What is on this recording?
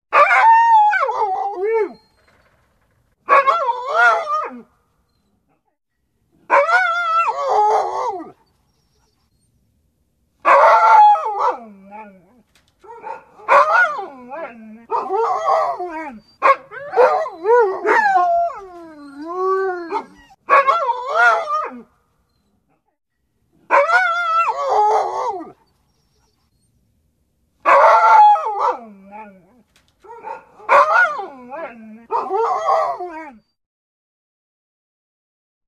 yowl a dog
A little dog yowl
animal, dog, yowl